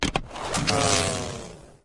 LINKOJEN LINKO-TCEX 01 1
skeet, target-shooting